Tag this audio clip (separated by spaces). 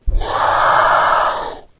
dragon roar